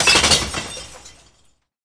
Glass Shatter Mix
Mix of multiple glass drop/shatter recordings.
break, breaking, broken, drop, dropped, glass, glasses, many, shards, shatter, smash